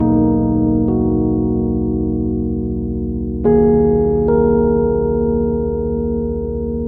Some notes. The Rhodes universe.
ambient, atmosphere, moody